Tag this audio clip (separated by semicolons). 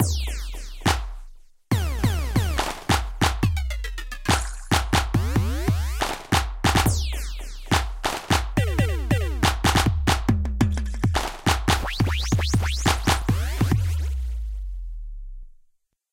pitch-shift; loop; sequence; interlude; clap; effects; drums; kick; delay; 140-bpm; synthesizer; breakdown; frequency-shifter